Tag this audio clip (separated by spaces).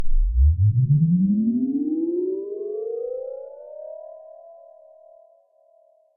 effect efx free fx lift lift-off sfx sound sound-design take-off